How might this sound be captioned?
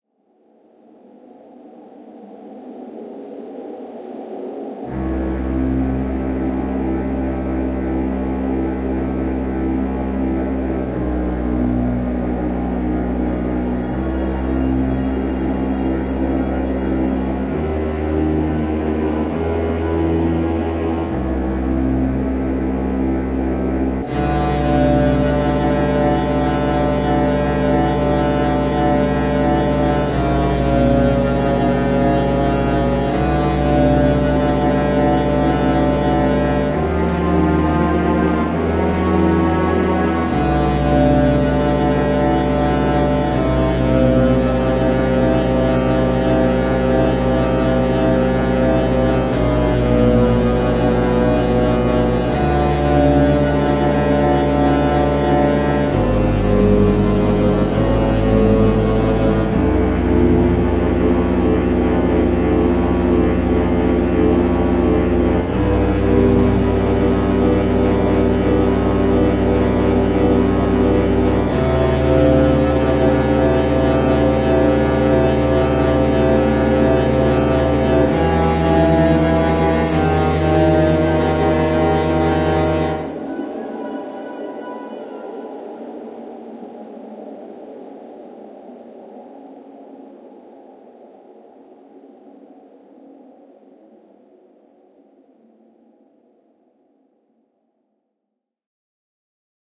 Dark Strings Music 01
Dark cello music made in Fl studio 12!
ambience, Ambient, Atmosphere, cello, Cinematic, creepy, Dark, Drone, Film, Free, Horror, Movie, soundtrack, spooky, string, strings, violin